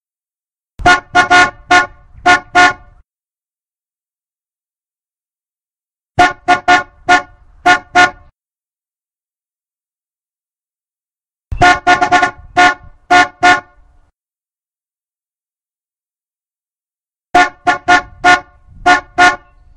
FlexHorn Shave&Haircut-Lowered
Recording of A Ford Flex car horn 'playing' "Shave And A Haircut" with pitch lowered to suggest a truck or van horn.
Car, tune